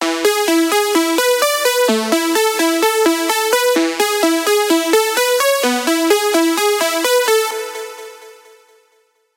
1 8th Saw Lead (128 BPM E Major)
This sound was created using the Nord Rack 2X and processed with third parie effects.
8th-Notes, 1, Hardstyle, Hardcore, Melodie, Lead, 128-BPM, EDM, Rave, Dance, E-Major, Electric, Music